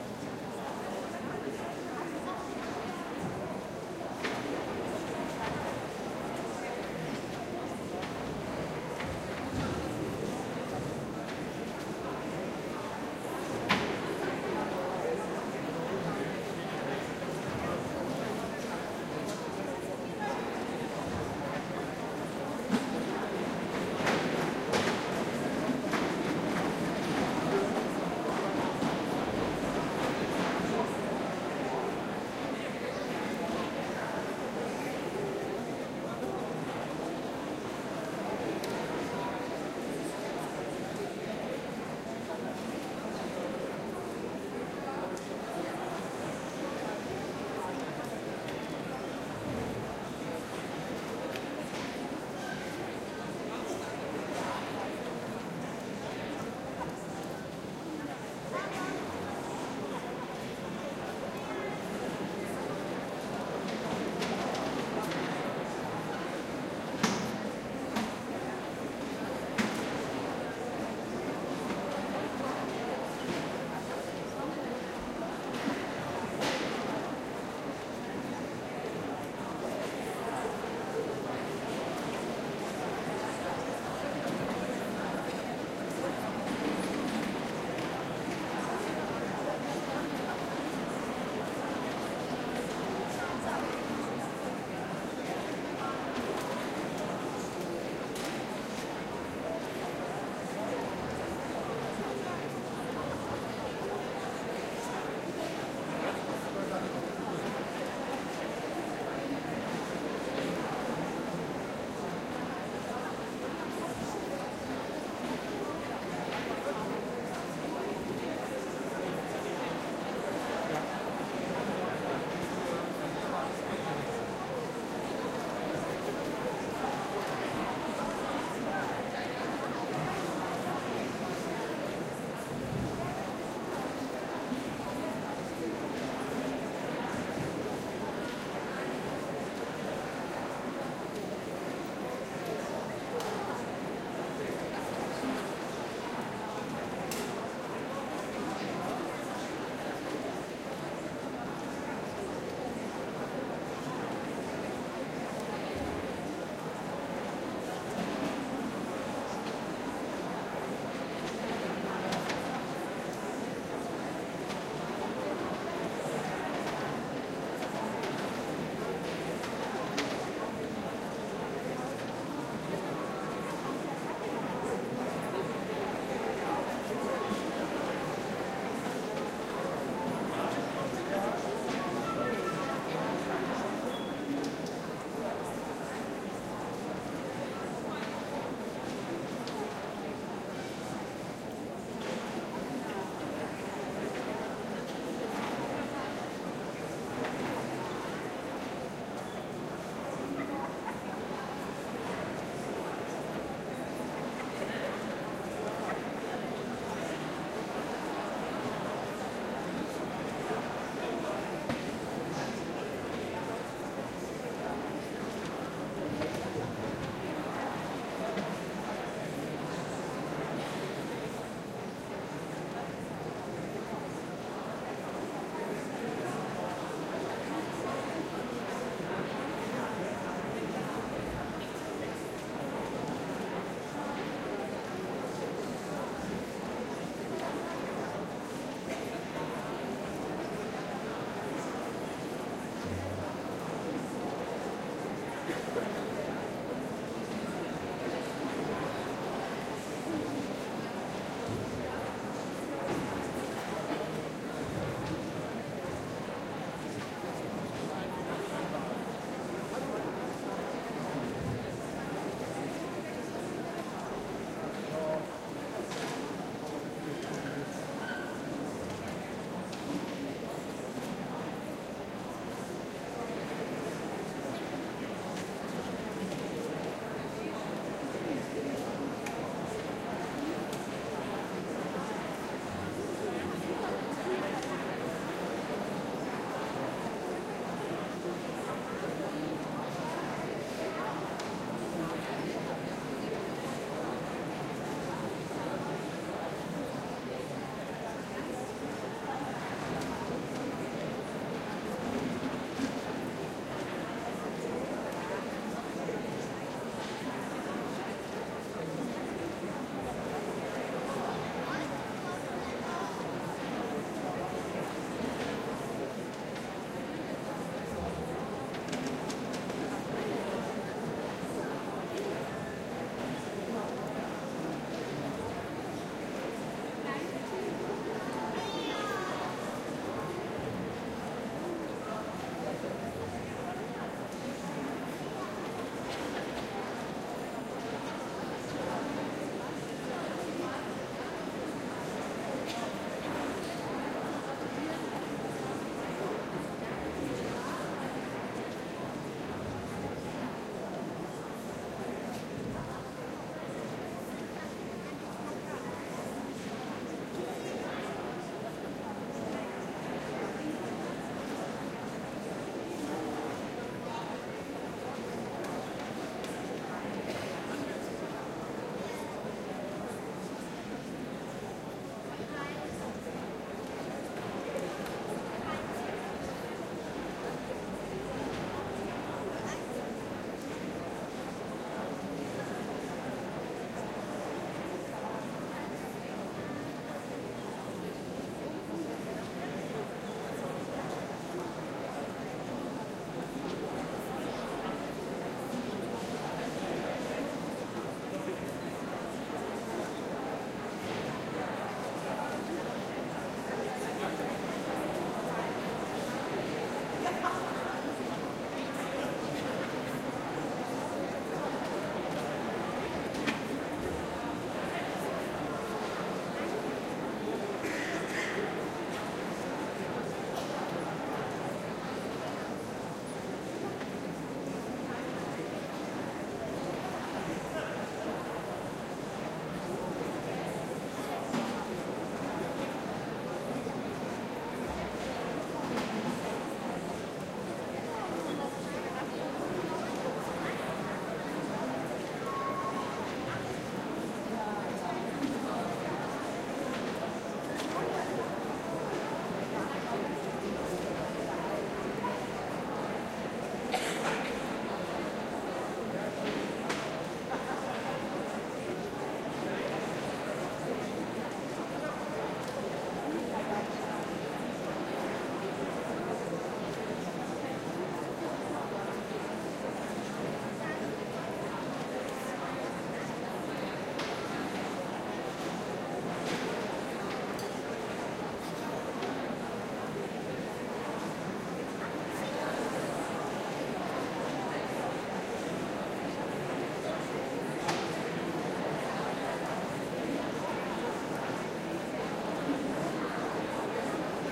Before event
An ambiance recording made after all the people were let in about 30 minutes before a classical concert with a light show started. Recorded onto a Canon XM2 and slightly edited with Adobe Audition 3.0
church, concert, chats, ambiance, field-recording, before, classical, people, warm-up, big, hall, room, talking, music, stuffed, event